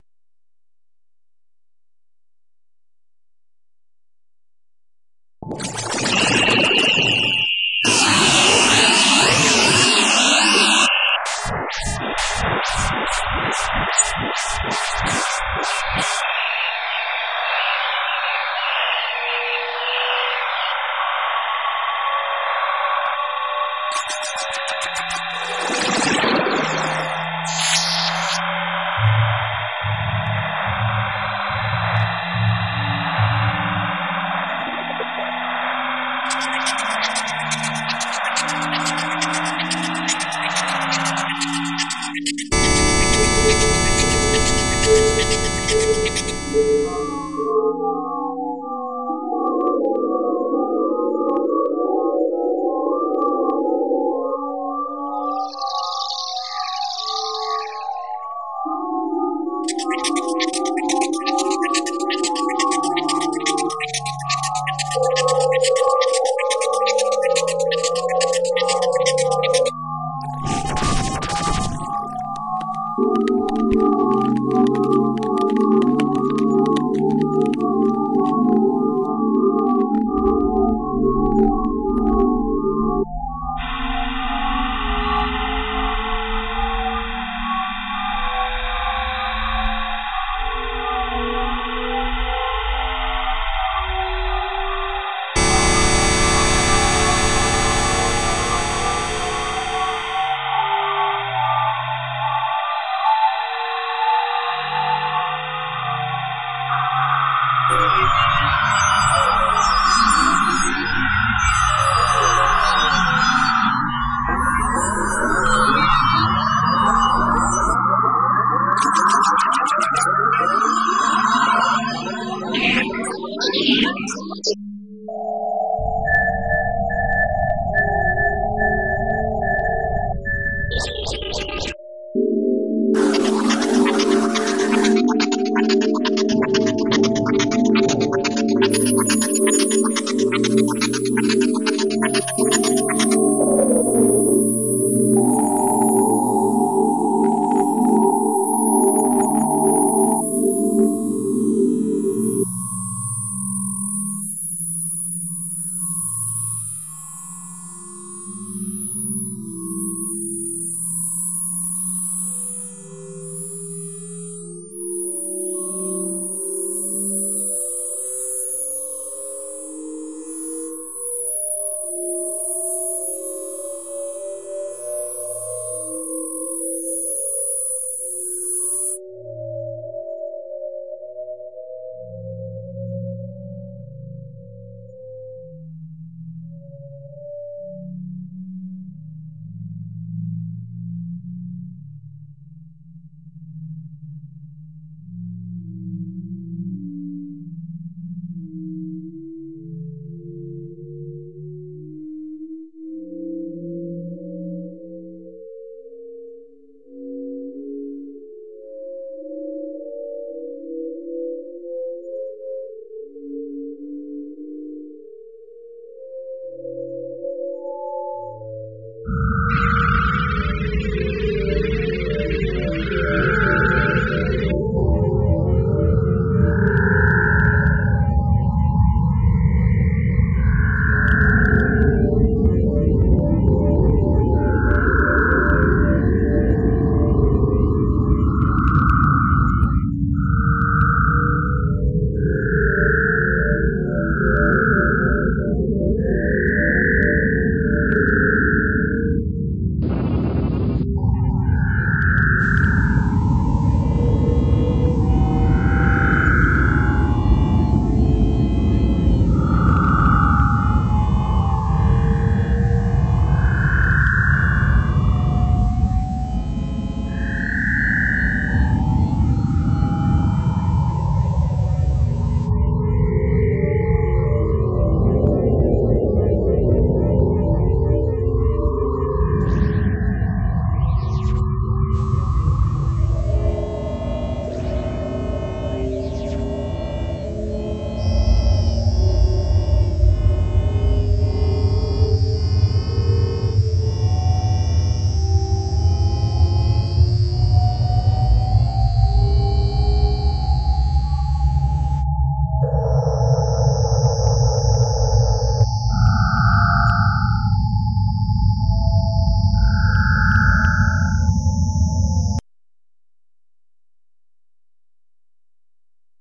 The great kazoo knows which notes to play, random sound generation at it's finest.
random, sound, noise